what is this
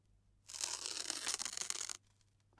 Paper Cutting
cutting paper with hands
cut, cutting, paper